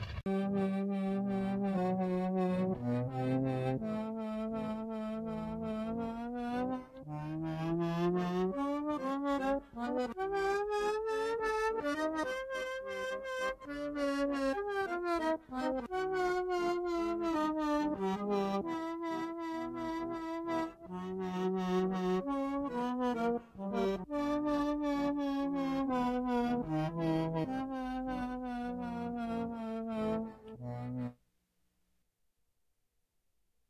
crazy accordion
crazy, accordion